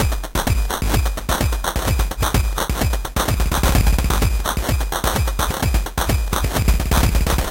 Bitcrushed House Loop #2 128BPM
Just the same loop as the others, but with slight tweeks and bitcrushed.
bitcrushed, cutoff, electro, french, glitch, groove, hat, hi, house, kick, loop, snare, stutter, ya